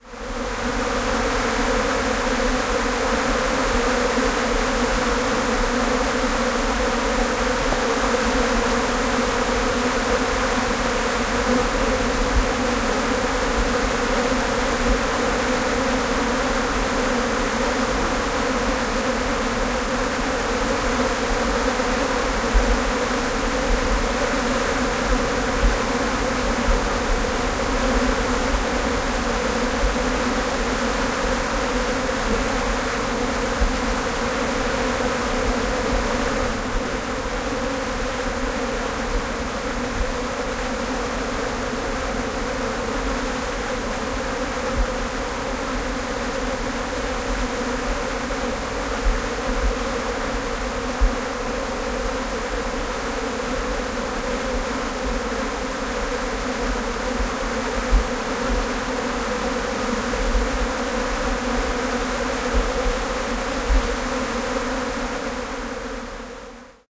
The sweet sound of Australian blowflies in mass!
blowfly, buzz, buzzing, flies, insect, swarm